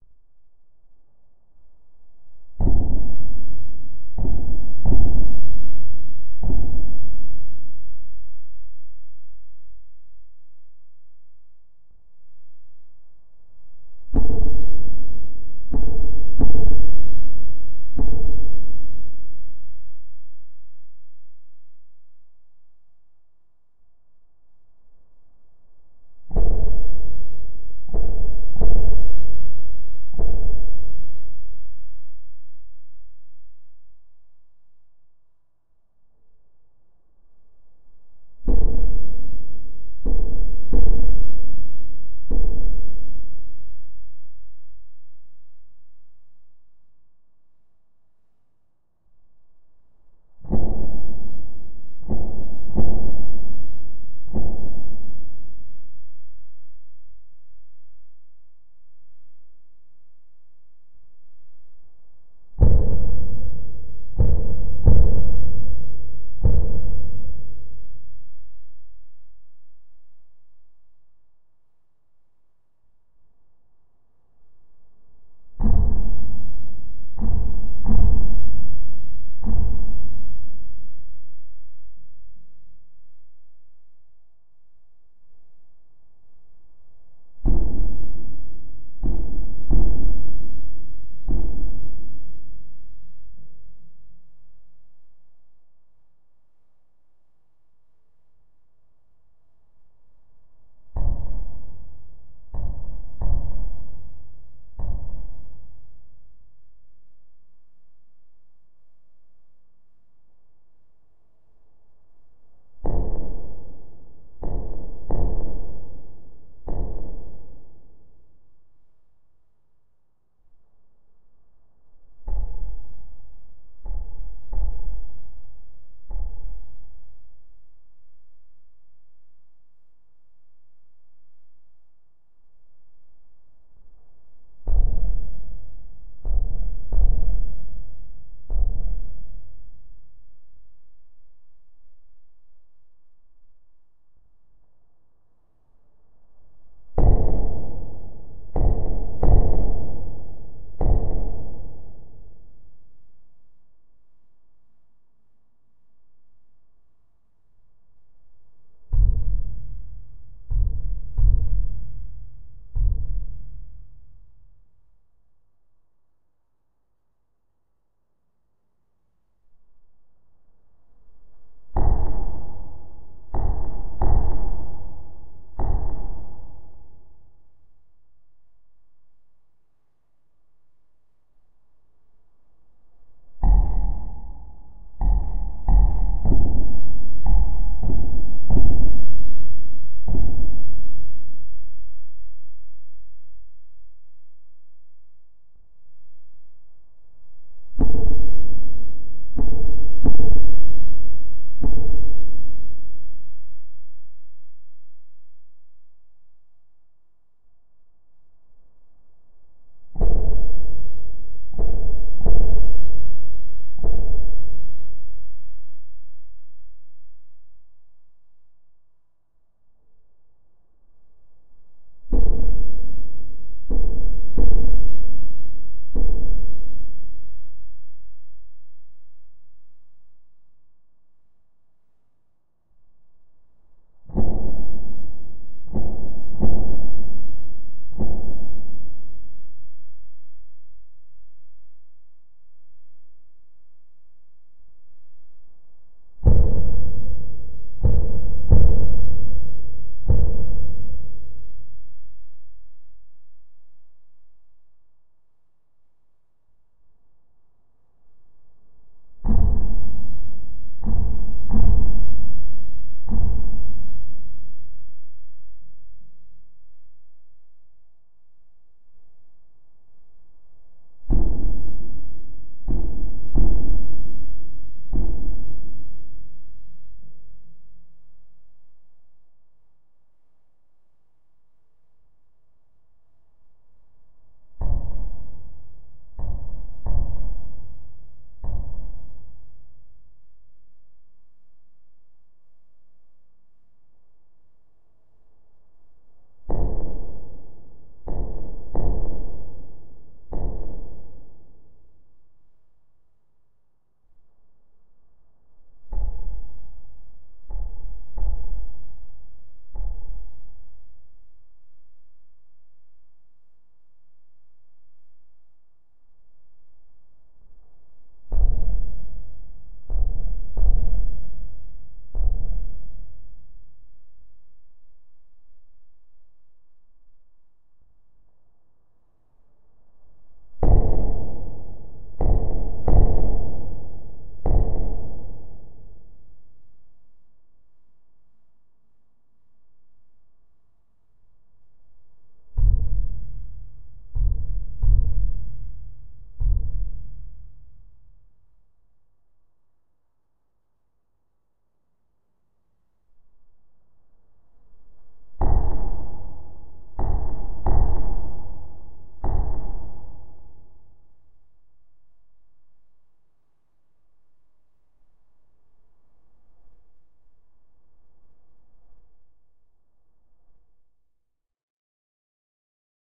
Suspense Drums
A suspenseful drum sound for your intro, or any thing that needs that extra touch of suspense.